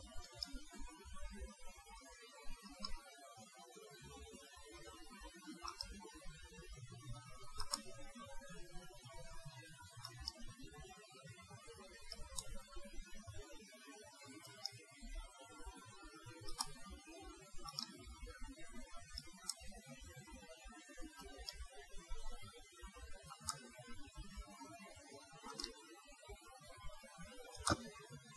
flashlight click on and off
the sound of turning an LED flashlight on and off
off, turn